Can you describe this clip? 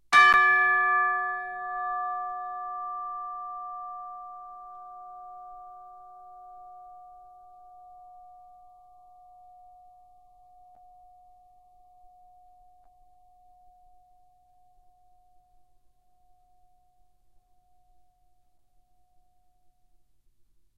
Instrument: Orchestral Chimes/Tubular Bells, Chromatic- C3-F4
Note: D, Octave 2
Volume: Fortissimo (FF)
RR Var: 1
Mic Setup: 6 SM-57's: 4 in Decca Tree (side-stereo pair-side), 2 close